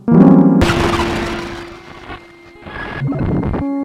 Casio CA110 circuit bent and fed into mic input on Mac. Trimmed with Audacity. No effects.